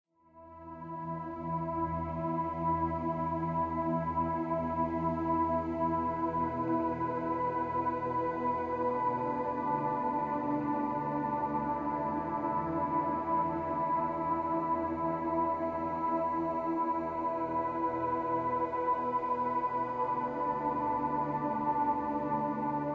10 ca pad in the shade
atmos, atmosphere, score, suspense, intro, background-sound, ambience, white-noise, music, atmospheric, horror, soundscape